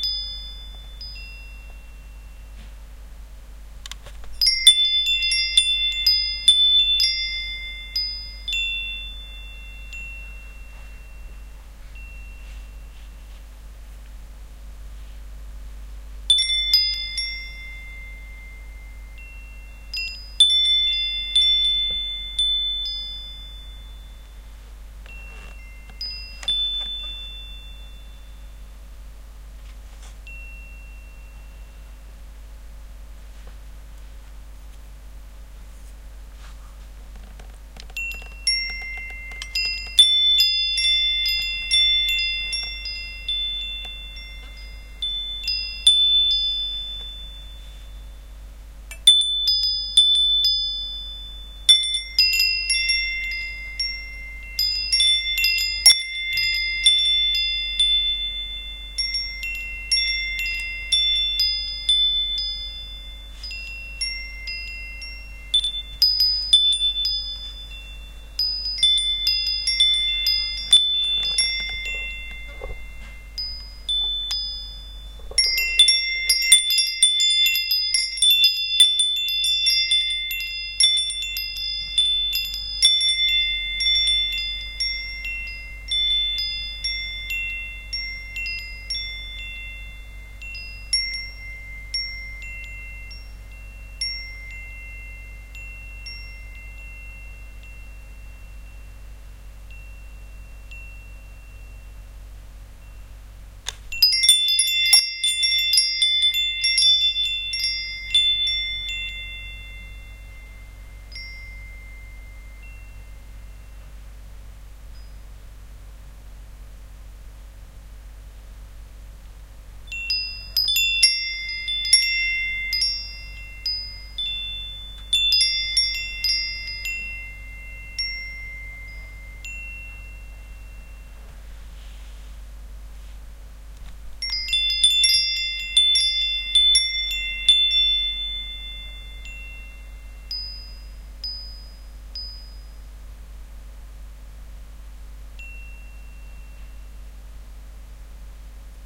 wind chimes post eq
A set of wind chimes recorded with my Sony ecm-ds70p mic through my Sony mdz-n710 into my Edirol audio interface recorded into audacity. I've tried to EQ out my computer fan noise but it is still present.
chimes, flickr, wind, wind-chimes